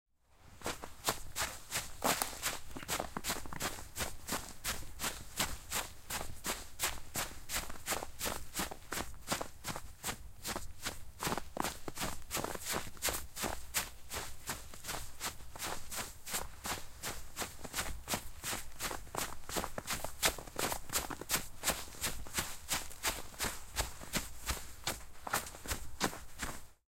Audio of running in snow-covered concrete with a pair of trainers. A light snowfall of about 3-5cm, the recorder was about half a meter from my feet. Slightly reduced frequencies below 50Hz.
An example of how you might credit is by putting this in the description/credits:
The sound was recorded using a "Zoom H6 (XY) recorder" on 2nd March 2018.